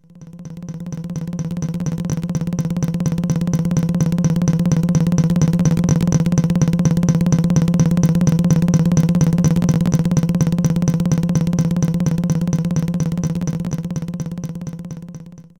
Speeding of tempo and speed with repeating 15 times with reduction before and after.